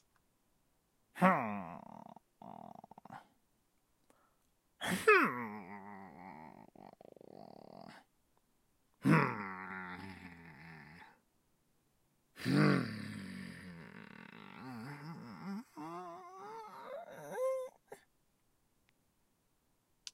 old ninja grunt
groan, ninja, groaning, grunt, master, moaning